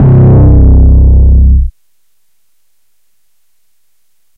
made with vital synth
808, bass, beat, drum, hard, kick